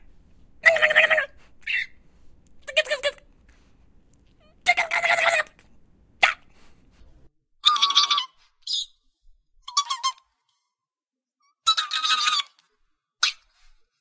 Squirrel Impression
Impersonation of a disgruntled squirrel. First half is the actual voice, second half has been pitch shifted up to create a more squeaky snarky squirrel.
angry, chirping, impersonation, Squirrel